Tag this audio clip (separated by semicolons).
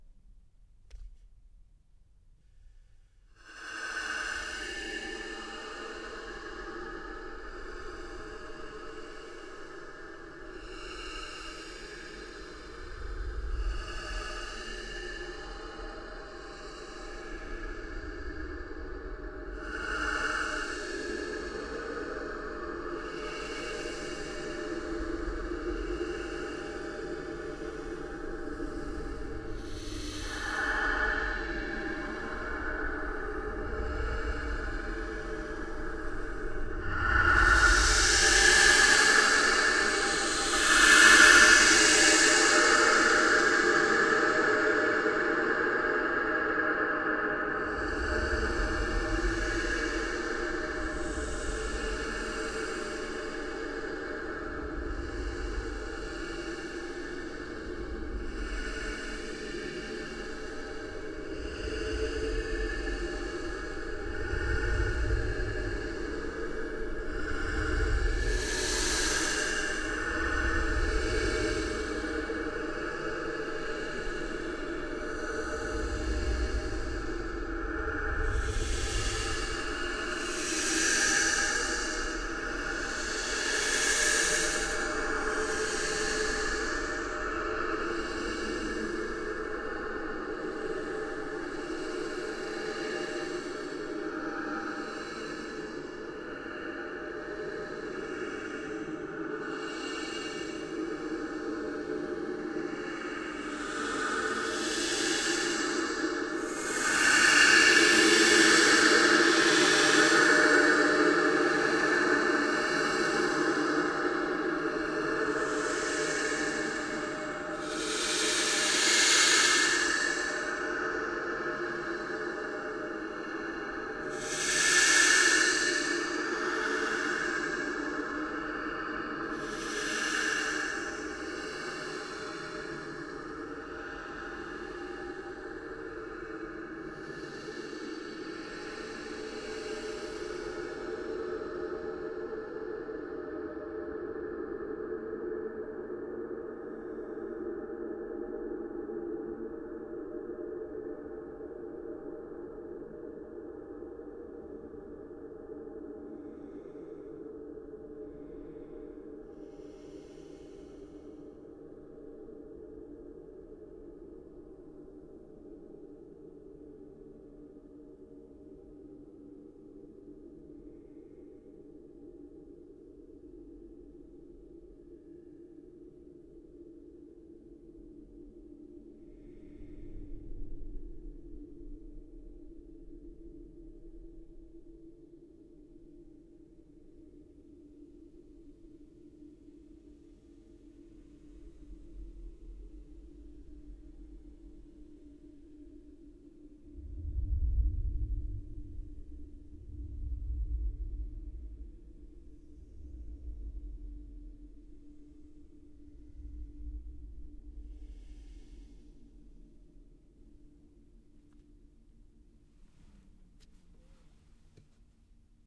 creepy; ghost; haunting; scary; whisper